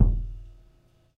One mic was on-axis and the other was off. The samples are in stereo only as to allow for more control in tone and editing.